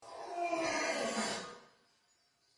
Person Yawning
A young man in a large room yawning.